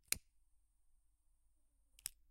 Cigarette lighter
cigarette, lighter, ignition, smoke, smoking, flame